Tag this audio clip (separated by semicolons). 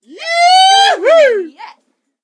129bpm; female; male; party; shot; shots; stab; stabs; vocal; vocals